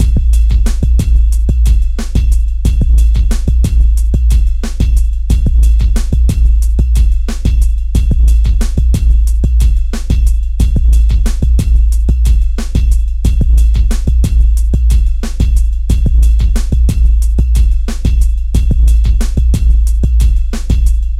dragging bassline, good groove, produced in reason......